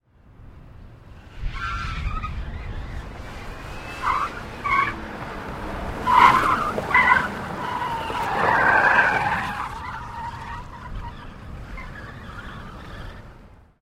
Chrysler LHS tire squeal 03 (04-25-2009)
3 of 4. Sound of a big car accelerating and the tires squealing as it takes multiple hard corners. Car is a 1996 3.5L V6 Chrysler LHS. Recorded with a Rode NTG2 into a Zoom H4.
skid, tire, tyre, squeal, car, speed, screech